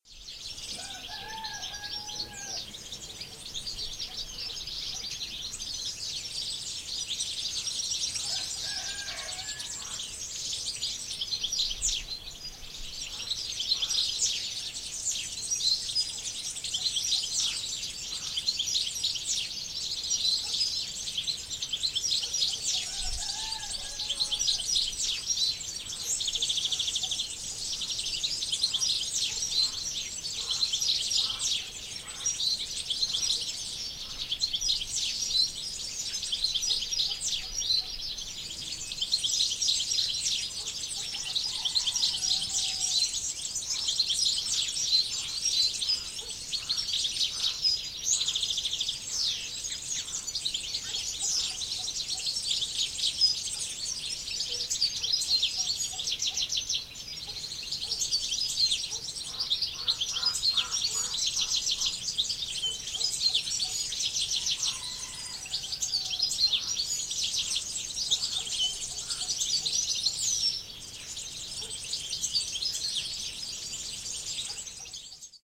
morning birds
Birds singing at sunrise on a hill near Forlì in Italy recorded by Massimo Portolani
birds, singing